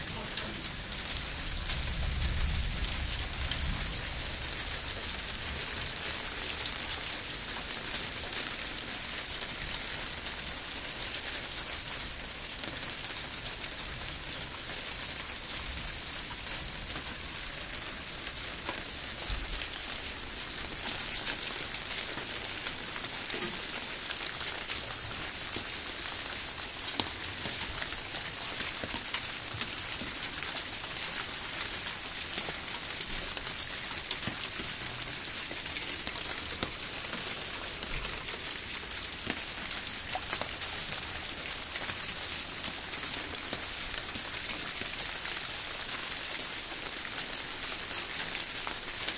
binaural rain in garden
I am standing in my back-yard. A distant rumble can be heard. Rain is falling down on the large kiwi-leafs and the sunscreen. Water is flowing down the drain in to the tub.
I walk a little round to the tub and return to the doorway.
Hardware used:
DreamEscape binaural mic